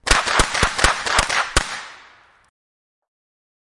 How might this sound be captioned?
9MM and 38 CLOTH443466 squidge316 iron-whoosh
This is a 9mm in the left hand and a .38 in the right hand being fired simultaneously. They are assigned to the left and right speakers for full effect.